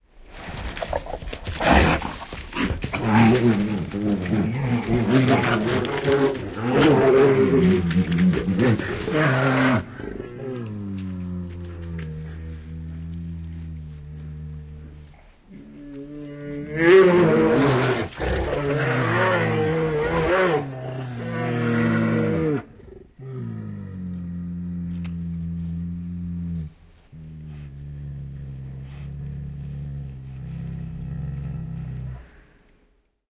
Karhut murisevat / A couple of bears growling, brown bear
Pari karhua ärhentelee ja murisee.
Paikka/Place: Suomi / Finland / Ähtäri (eläinpuisto)
Aika/Date: 06.05.1975